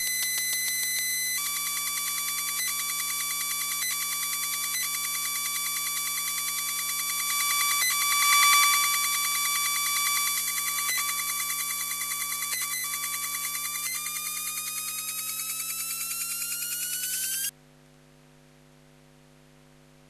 [Elektrosluch] Power Plug Switching off
Electromagnetic field recording of a switch mode power plug using a homemade Elektrosluch and a Yulass portable audio recorder.
8bit, electromagnetic-field, elektrosluch, power-plug